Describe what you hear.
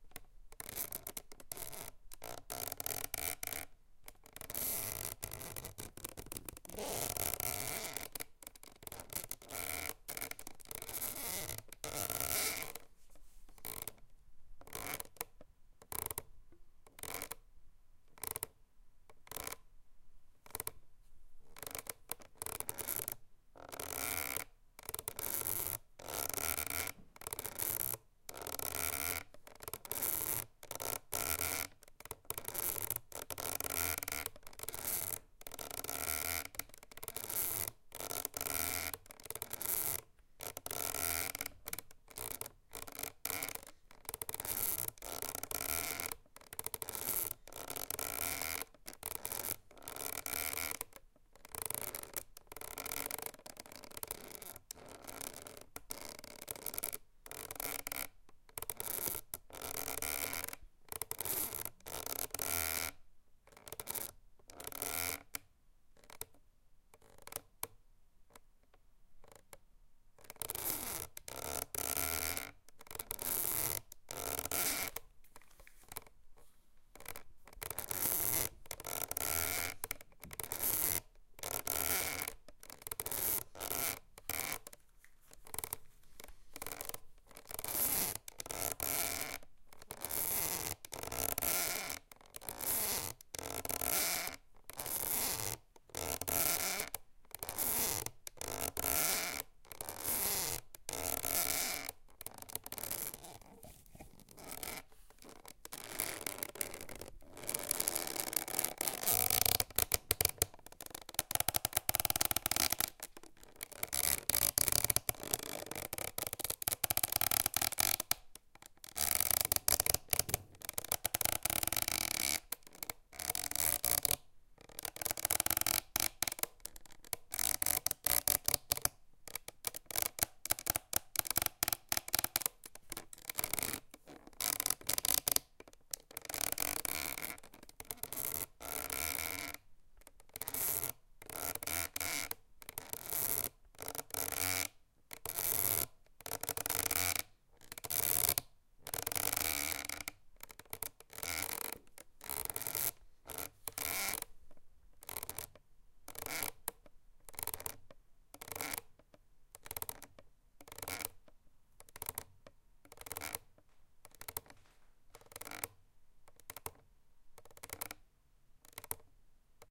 hamp rope creaks
hammock swinging on the hemp rope thrown over the wooden beam…recorded on Zoom H4n
creaks, hamp, rope, swing